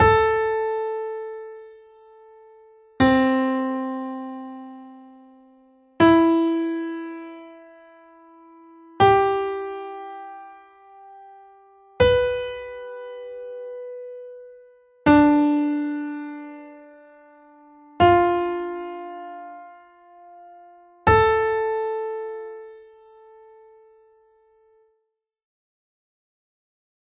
A minor Aeolian New without octaves
a, aeolian, minor, new